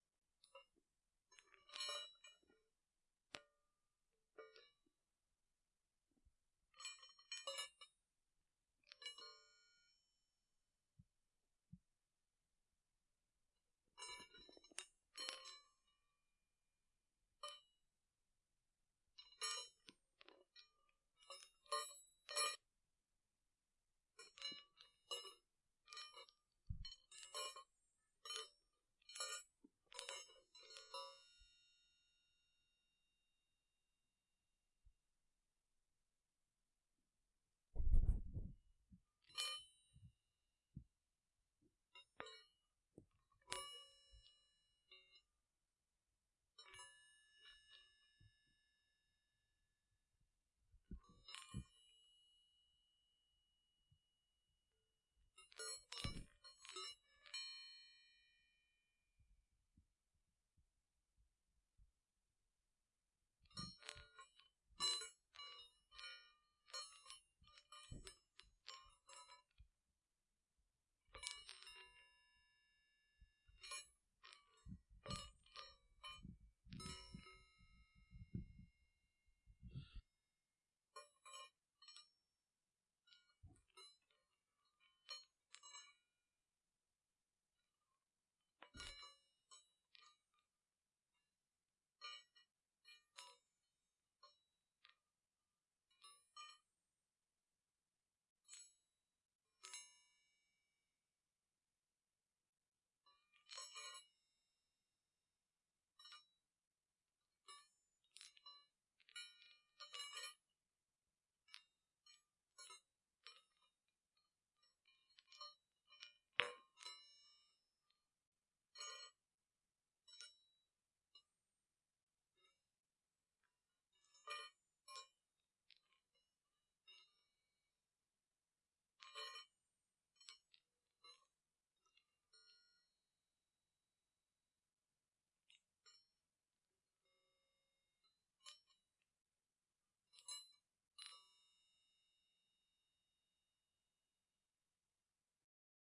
Baoding Balls
Baoding meditation balls with bells inside them moving around in my hands.